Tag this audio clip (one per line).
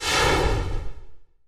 puff
exhaust
steam